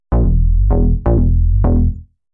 Techno Basslines 011
Made using audacity and Fl Studio 11 / Bassline 128BPM
128BPM, bass, Basslines, sample, Techno